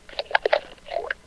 hf Noise voice
Made by scratching a cassette's tape with a paper clip and playing it in my stereo.